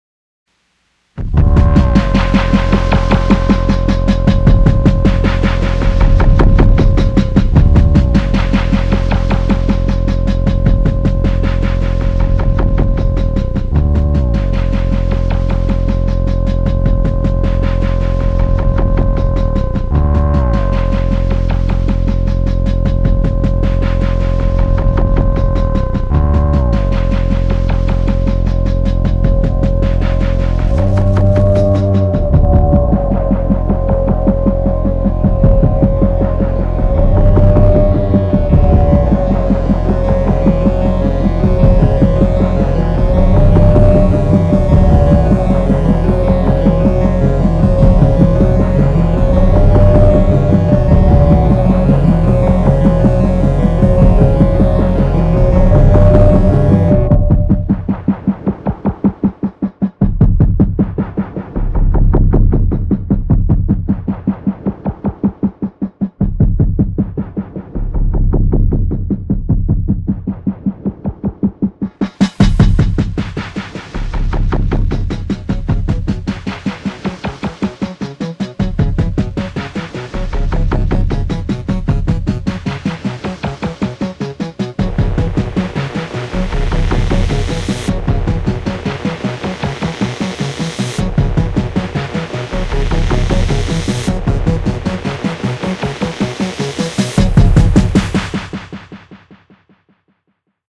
Wax Track: Industrial IDM Score Music
90s, warp records-esque industrial film score, for free use in videos, podcasts, commercials in more.